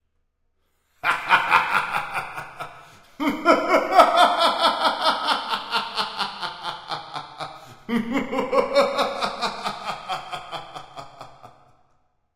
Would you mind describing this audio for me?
Maniacal Laugh 2 plus reverb
Varying Maniacal Laughter
maniac, crazy, laugh